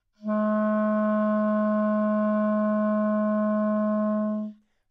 Clarinet - Gsharp3
Part of the Good-sounds dataset of monophonic instrumental sounds.
instrument::clarinet
note::Gsharp
octave::3
midi note::44
good-sounds-id::3285
clarinet, good-sounds, Gsharp3, multisample, neumann-U87, single-note